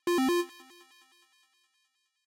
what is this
An interface sound meant to alert the user, made with LMMS' LB 302 synth. Enjoy!